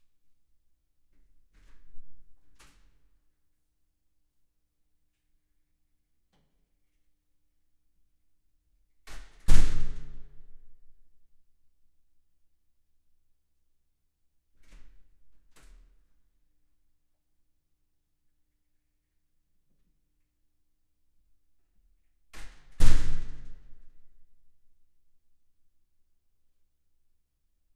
Recorder: Fostex FR-2
Mic(s): 2x Audix SCX-1 O (Omni)
Mic Position(s): about 15cm from L/R walls of a 1.5m wide, but long hallway; 2m away from door; about 1.5m height; 'inside'
Opening and closing of a heavy metal door (with big, heavy security glass inserts) within a long (flat concrete) hallway.
This recording was done on the 'inside', meaning that the door swings towards the mics while opening.
Also see other recording setups of same door within package.
door, metal